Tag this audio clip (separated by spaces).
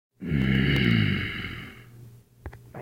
field-recording rage